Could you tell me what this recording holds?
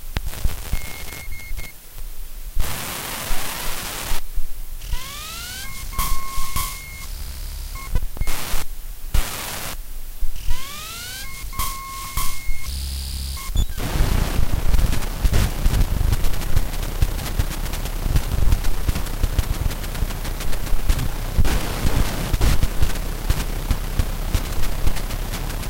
cd load 26sedit
sound of a CD drive loading a CD / reading the header. includes background fuzz.
distorted, industrial, machines, noise, unprocessed